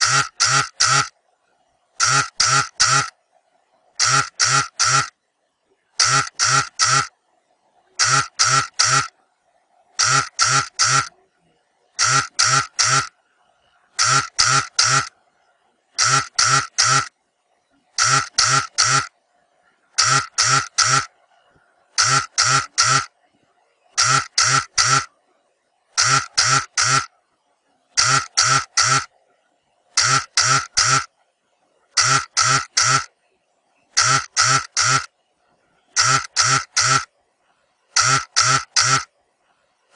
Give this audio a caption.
cell-phone-vibrating
Nokia 3595 vibrating
vibrate, vibration, cellphone, cell, mobile, vibrating